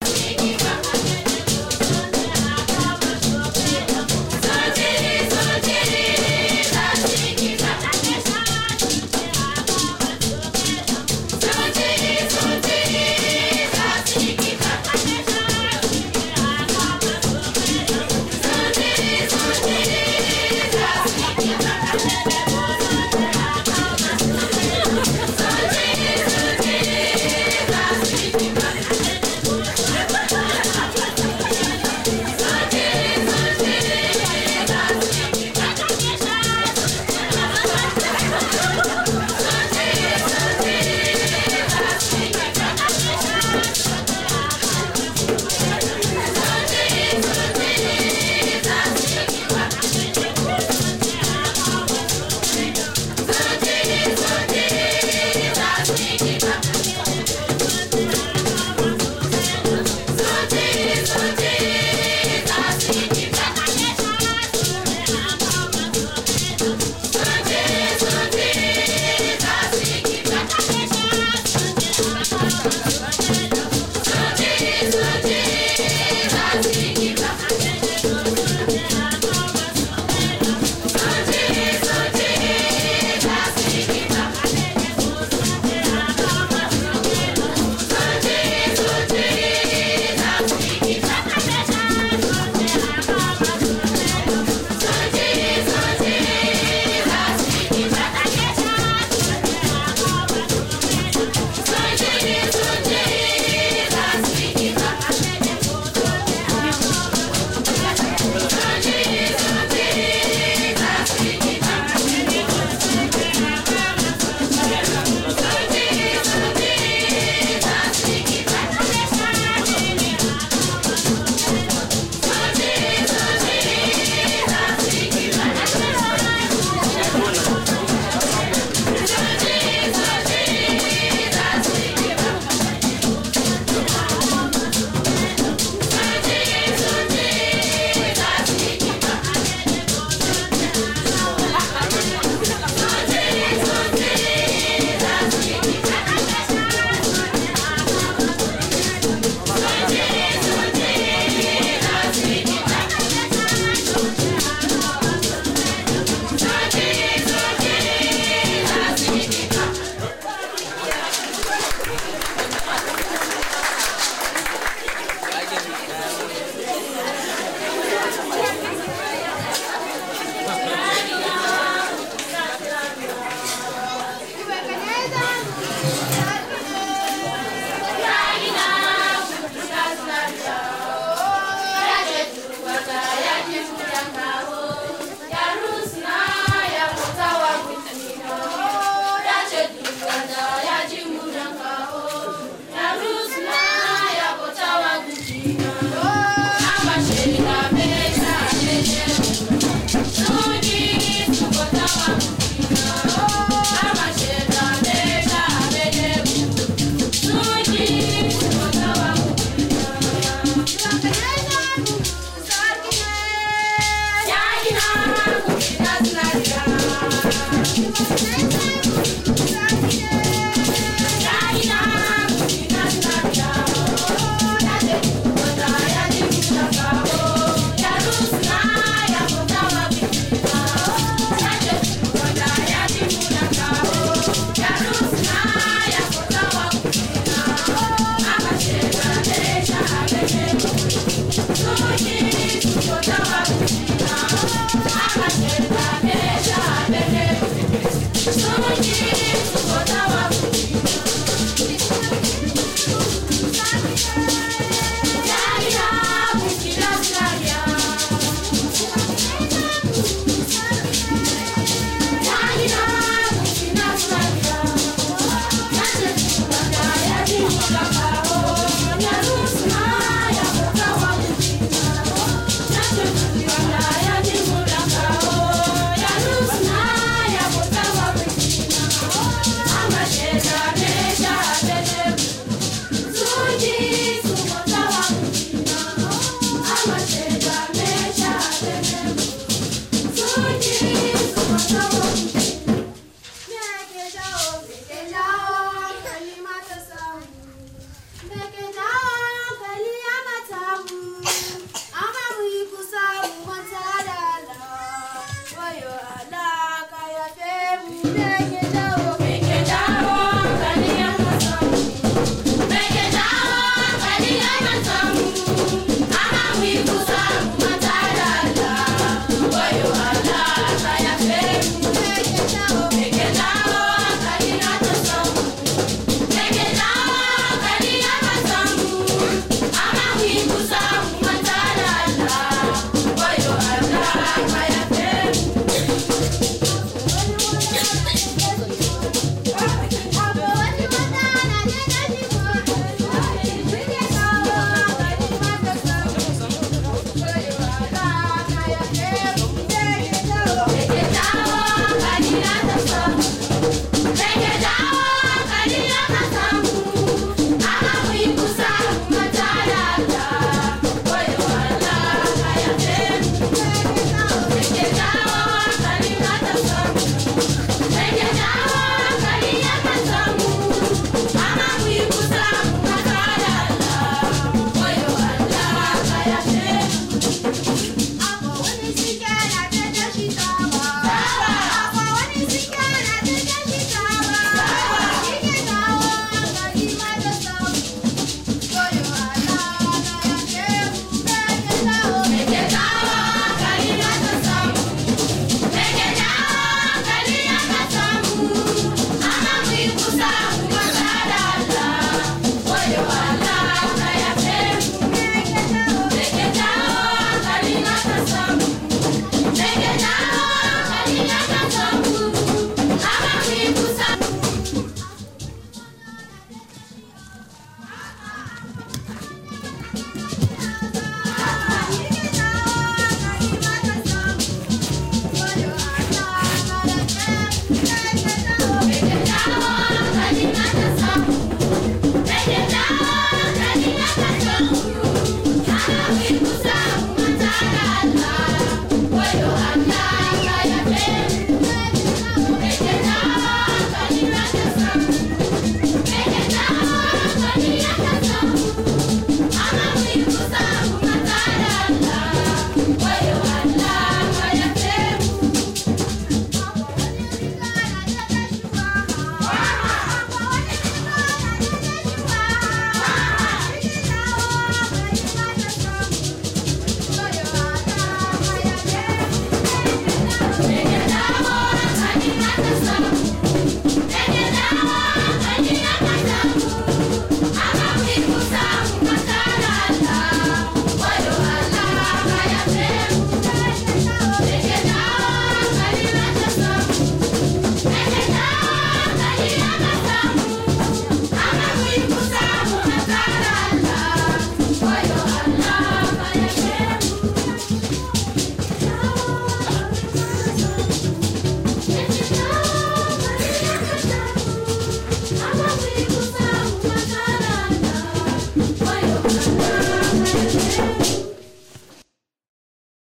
NIGERIAN CHURCH WORSHIP
Recorded with a Sony Shotgun mic (sorry) to HDV video in 2011 while attending church in the Kisyahip Village outside Jos, Nigeria, in the Plateau State.